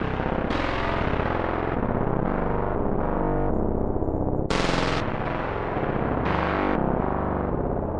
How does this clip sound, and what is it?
MOV.baix 3
Sinte bass line Logic
processed, bass, electronic, noise